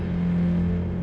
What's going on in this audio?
String Slice Long
Slice of sound from one of my audio projects. A stretched sting slice. Edited in Audacity.
Effect, Slice, Snippet, String